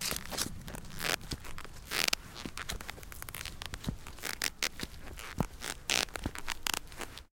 boots leather bend creaking squeeze
Bending my shoes and record it